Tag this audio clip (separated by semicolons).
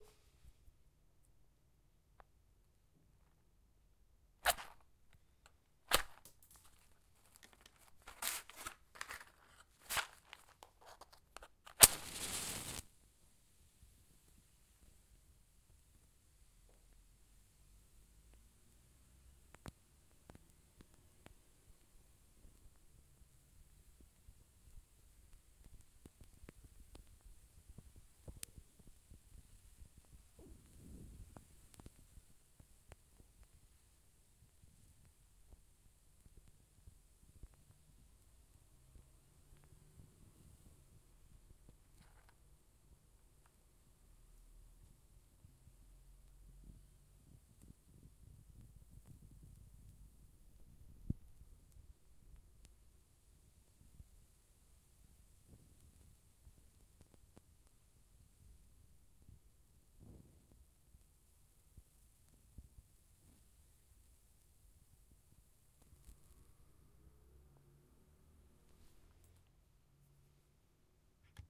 kitchen; wood